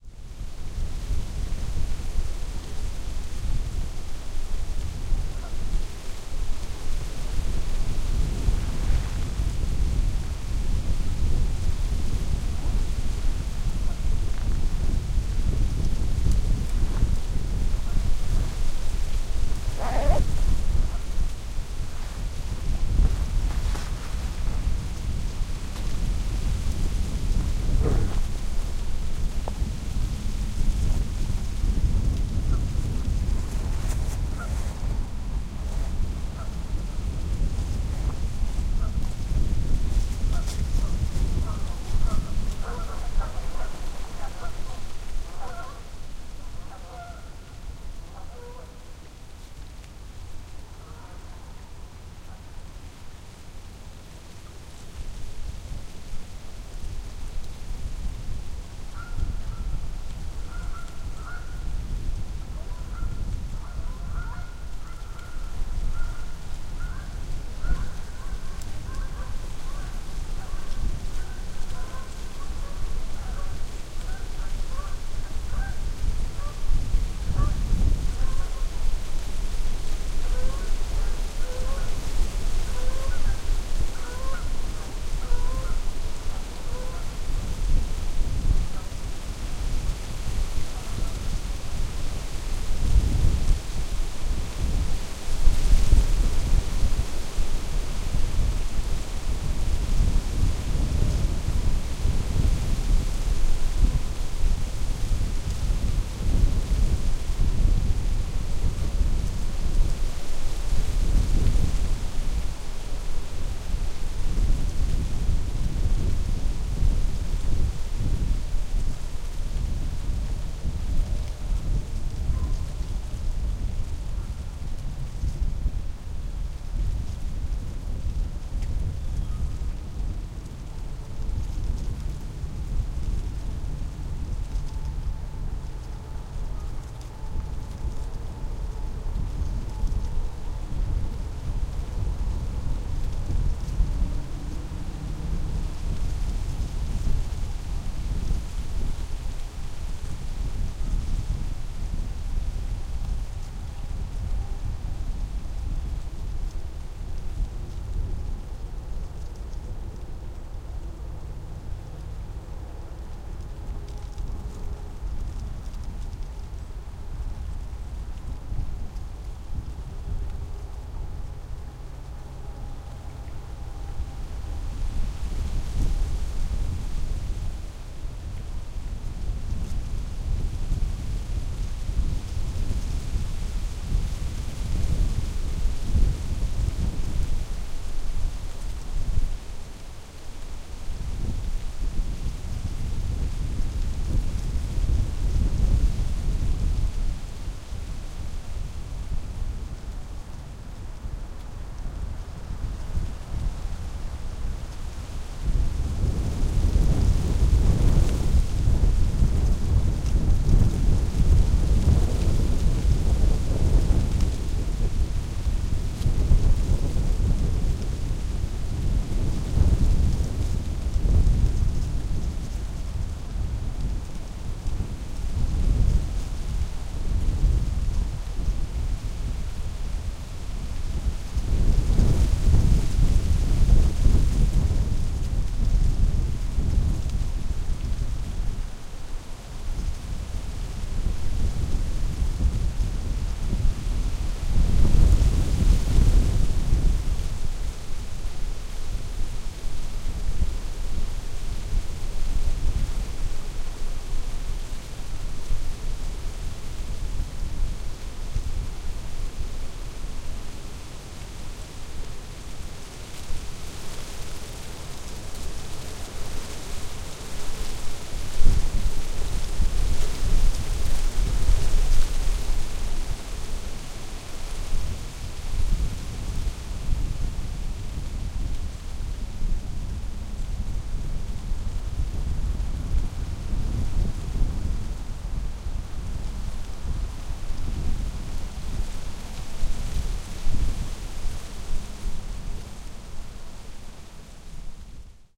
WinterWindThroughDryOakLeavesPlusGeeseDec21st201541hz
Recorded on the shortest day of the year at the edge of a lake where the wind was gusting from the South at 15 to 20 miles an hour. At the beginning you hear some geese in the distance.
This recording was made using my SONY PCM M-10 with it's internal microphones set to level 5 input volume. I actually placed the recorder, carefully, in a crook of some branches in an Oak tree about 5 feet above the ground---very near a big clump of dead and brown oak leaves....this gives you that wonderful sound of the wind hissing through the leaves.